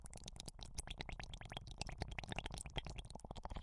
drippling bubbles like boiling in water
blowing through a large diameter dringking straw into bubble tea
wet, bubbling, water